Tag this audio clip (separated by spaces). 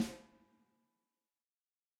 14x6 accent audix beyer breckner combo drum drums dynamic electrovoice kent layer layers ludwig mic microphone microphones mics multi reverb sample snare stereo technica velocity